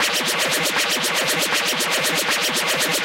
Flanger Alarm
loop, mono, alarm, sweeping